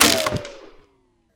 Ricochet wood3
bang, crack, gun, metal, ping, pow, ricochet, shoot, snap, ting, wood